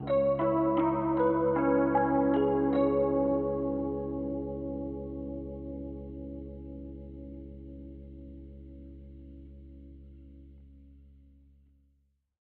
Much love and hope the community can use these samples to their advantage.
~Dream.